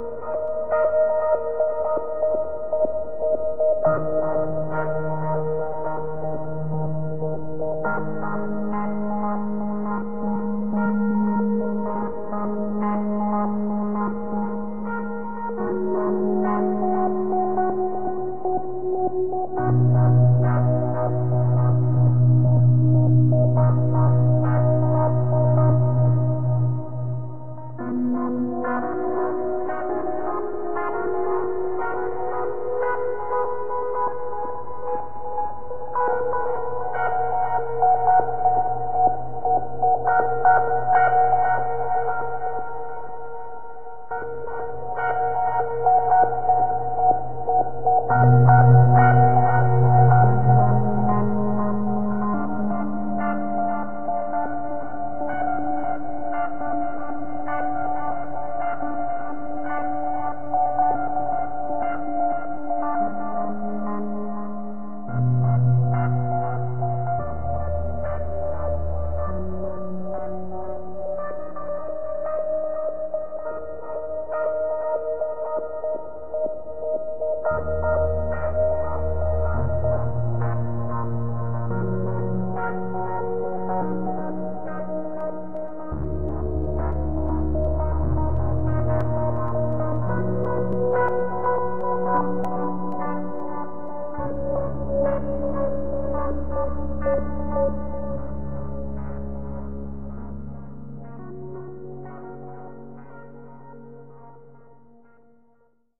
Loosely based on Rachmaninoff's Elegie in E Flat Minor. I played this on my Suzuki electric piano with midi to Music Maker daw, using Cinematic Synth virtual synthesizer.